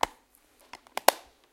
essen mysounds jasmin
sound of the opening and closing of a dvd box
mysound, object, germany, Essen